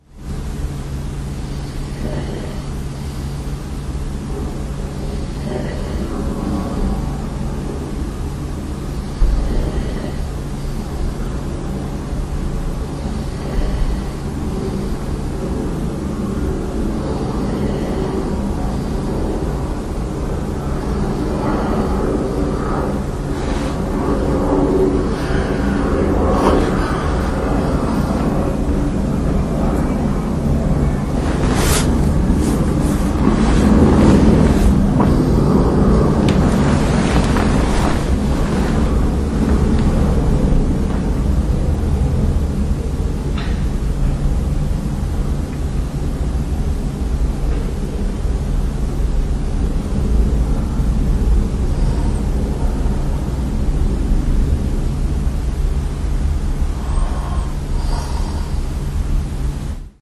An Airplane that left Amsterdam Airport Schiphol a short while ago, passes me sleeping. I haven't heard it but my Olympus WS-100 registered it because I didn't switch it off when I fell asleep.
airplane, bed, engine, field-recording, human, lofi, nature, noise, traffic